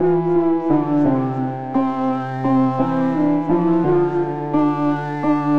A tune made with FabFilter Twin 2 and FabFilter One synths // 86bpm
song, pixel, 86bpm, wonderful, music, loop, melody